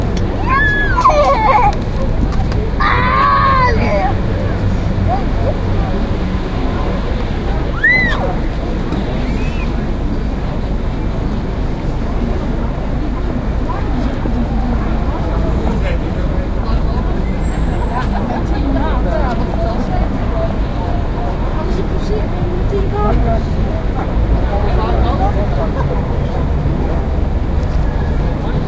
maastricht vrijthof noisy 2
In the city of Maastricht.
Recorded with Edirol R-1 & Sennheiser ME66.
birds, cars, center, centre, chattering, chatting, city, driving, field-recording, kids, maastricht, people, place, shopping, town, traffic, walking